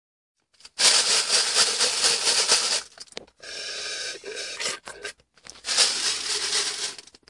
얼음흔드는쪼로록
ice eat shake
shake, eat, ice